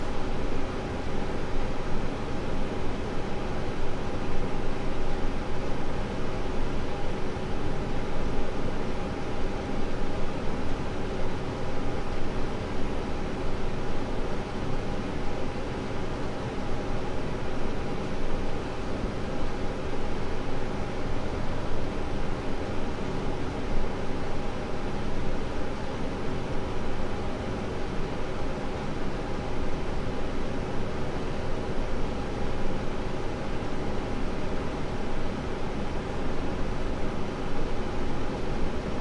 room tone small security camera room with TVs +air conditioner Chateau St Ambroise2 Montreal, Canada
security, tone, camera, room, small